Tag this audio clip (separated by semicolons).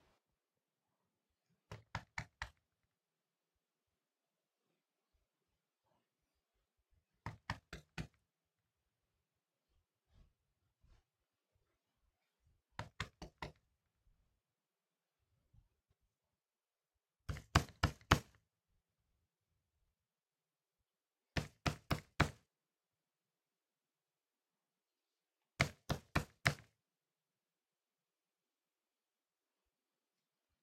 door; wooden